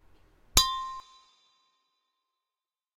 C5note (Glass)
Water glass struck by chopstick. Notes were created by adding and subtracting water. Recorded on Avatone CV-12 into Garageband; compression, EQ and reverb added.
clear-note, fast-attack, single-note, strike, medium-release, Water-glass